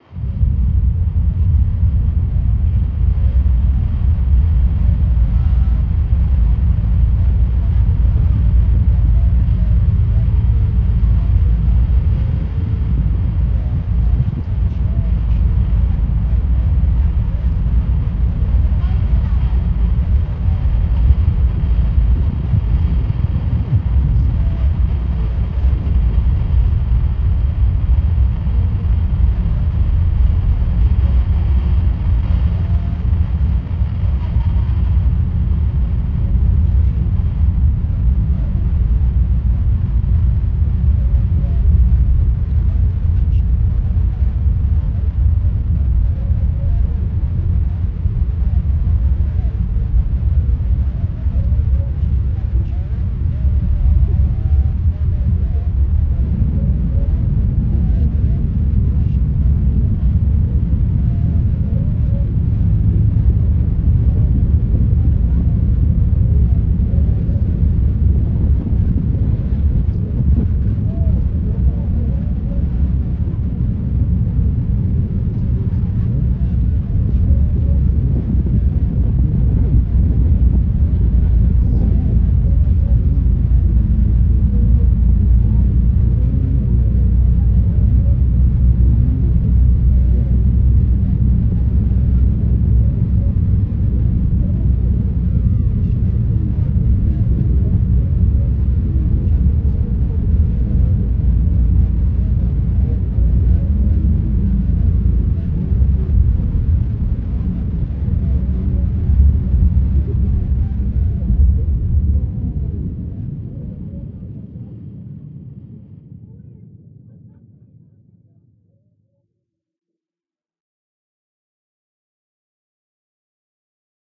Space ambience: passenger, muffled talking, hushed, whisper, sad, atmospheric, screaming. Hard impact sounds, soft, dark tone. Recorded and mastered through audio software, no factory samples. Made as an experiment into sound design, here is the result. Recorded in Ireland.
Made by Michaelsoundfx. (MSFX)